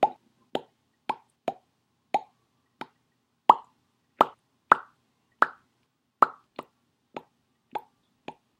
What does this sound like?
A bunch of vocally produced pops and bubble sounds I made for objects in a short animation project.
Recorded in Audacity using the stock mic in a 2010 MacBook Pro.